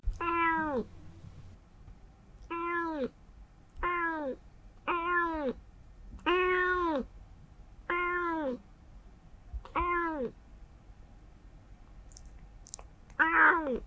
My cat has an interesting meow and likes to have conversations. My half of the chat is cut.
Recorded with a Sennheiser ME-80 going straight into a Zoom H5. Noise reduction and gain added in Audition.